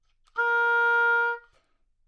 Part of the Good-sounds dataset of monophonic instrumental sounds.
instrument::oboe
note::A#
octave::4
midi note::58
good-sounds-id::8001
Asharp4, good-sounds, multisample, neumann-U87, oboe, single-note